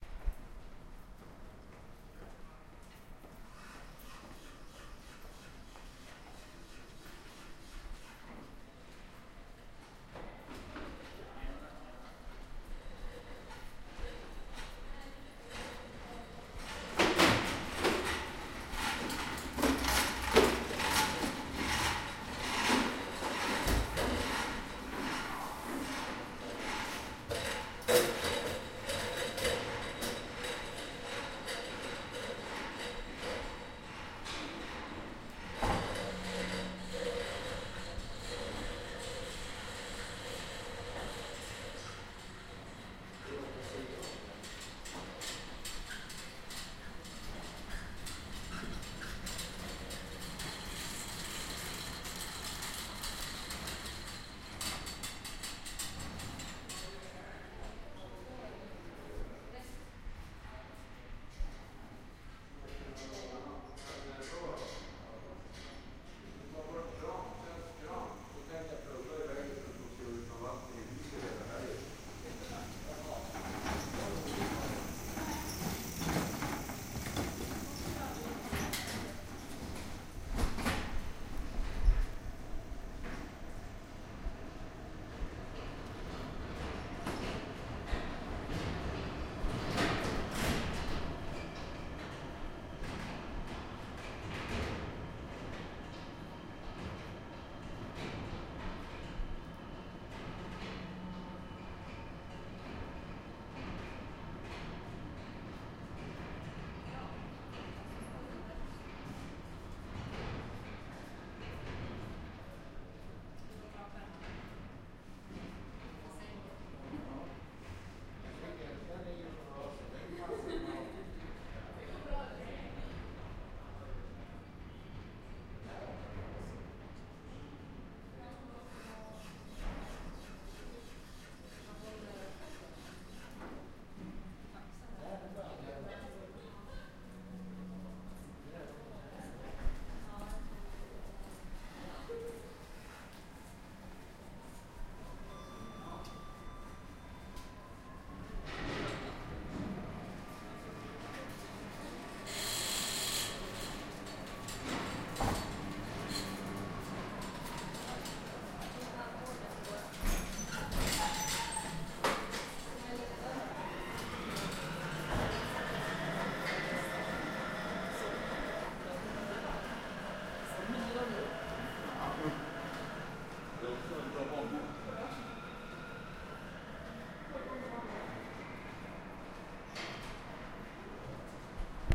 Clip recorded with a Zoom H1 in a corridor under the hospital in Umeå, northern Sweden